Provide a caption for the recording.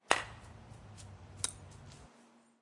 hit01 stereo
A short stereo sample recorded outdoors using a Micro Track and a couple of condensers. A croquet ball is hit with a mallet on the right side of the stereo field- that sound echoes off a house to the left. The ball travels through short grass toward the microphones, where it strikes a metal wicket.